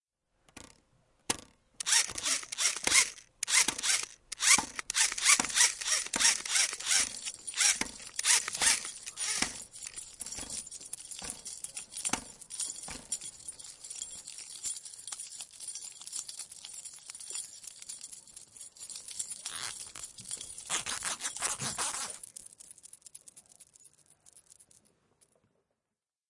soundscape-IDES-agitation du SP3 par caroline, shana et ibtissem
A rather agitated compostion made using some of the sounds uploaded by our partner school in Ghent, Belgium.
Belgium, compostion, Ghent, IDES, paris